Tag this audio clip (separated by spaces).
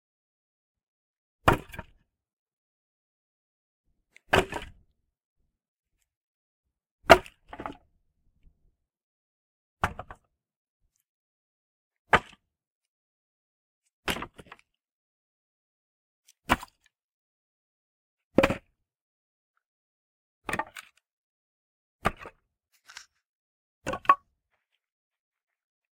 bedroom camera can car common door film foley foot garage house household jump kodak light step switch trash trash-can